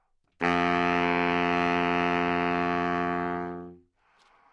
Sax Baritone - F3
Part of the Good-sounds dataset of monophonic instrumental sounds.
instrument::sax_baritone
note::F
octave::3
midi note::41
good-sounds-id::5532